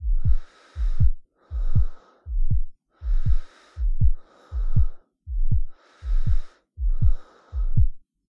PANTIGNY JeanLoup 2017 2018 heartbeatBreath
The heartbeat loop was 100% generated in Audacity.
In order to do it, I generated a chirp (Sifflet in french) with a starting frequency of 100hz and and ending frequency of 1hz.
The amplitude was also reduced from 0.75 to 0. The duration of this chirp was set to 0.5 seconds.
To make the second part of the heartbeat, I generated another chirp, with a higher starting frequency, a lower amplitude at the start and a duration of 0.25 seconds.
I shifted the second chirp so that the two chirps simulated a full heart beat.
I then added some silence at the end of the cycle, and then I repeated the cycle 10 times.
I added some reverb and augmented the bass (as well as lowering the treble) to smooth the sound and make it sound like a deep heartbeat.
I recorded myself breathing, and I added this sound while making sure that 3 respiration cycles would perfectly align with 11 heartbeats, in order to make a perfect loop.
Typologie/morphologie de P. Schaeffer
body, breath, breathing, heart, heartbeat, human, loop